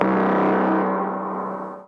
synthetic industrial sounddesign
synth, hit, fx, perc, metal, percussion, industrial